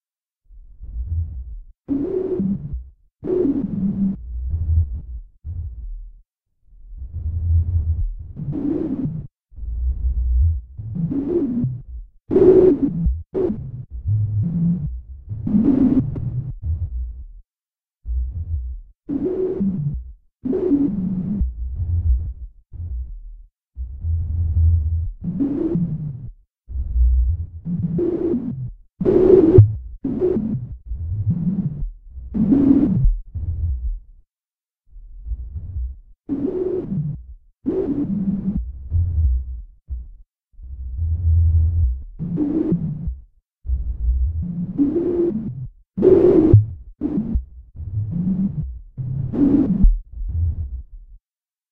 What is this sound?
atlas3q-1noise-16bit
Sonification of collision display data from the CERN Large Hadron Collider. Sonification done by loading an image from the ATLAS live display and processing with a Max/MSP/Jitter patch. This is the filtered noise channel of a three-channel rendering.
data, filtered, physics, hadron, sonification, collider, large, experiment, noise, proton, lhc, atlas